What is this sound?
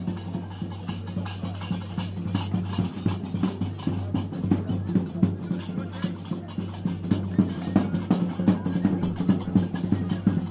Marrakesh Ambient loop
African Music Recorded in Marrakesh. Loopable.
Recorded with a Sony PCM D50
african, arabic, loopable, marrakesh